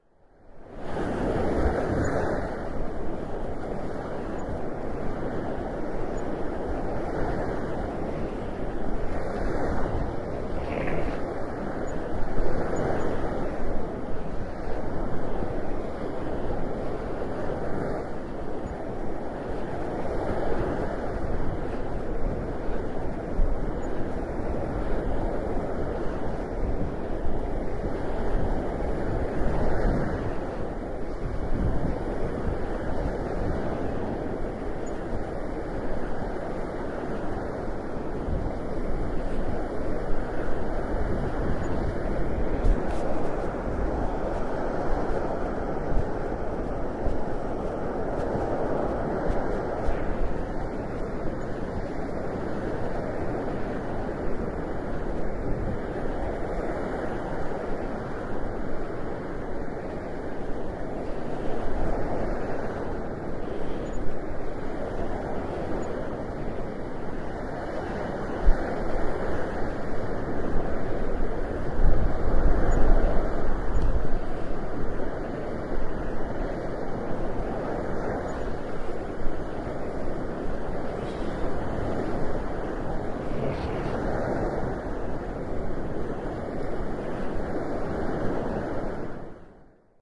Walking along the shore line of the North Sea near Callantsoog (nl). A Edirol R-09 in the breast pocket of my jacket recording the sound of the breakers reaching the beach.